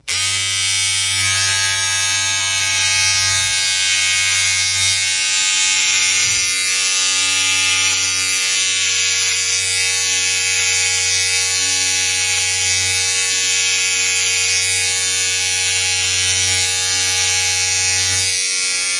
Beard Machine shaves beard